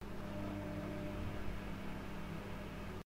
washing machine E (monaural) - Draining
field-recording, high-quality, washing-machine